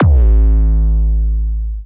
The artificial explosion created by pitch-shifting bass synthesizers in FL studio plus some percussions.